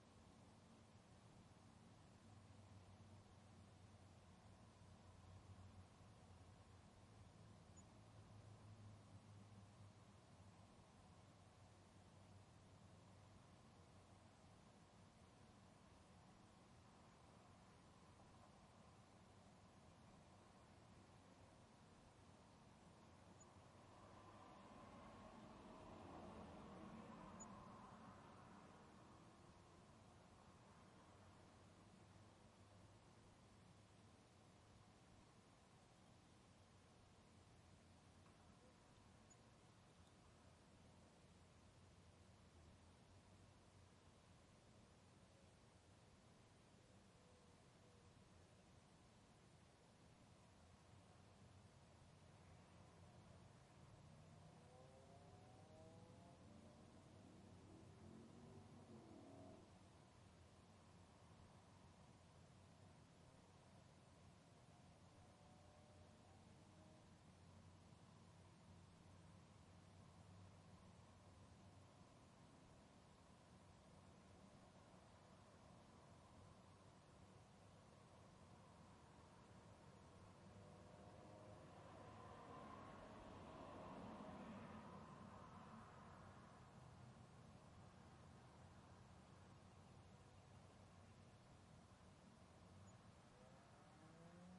Room tone w light outdoor ambience-7eqa 01-02
Recorded with Zoom H4N, ambience recording. Basic low cut filer applied.
background, field, recording